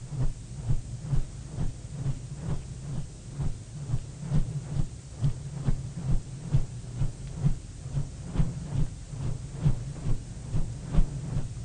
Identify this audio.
copter.slow.06
sound of a rope swung in front of a mic, pitch lowered
helicopter, effect, processed, rope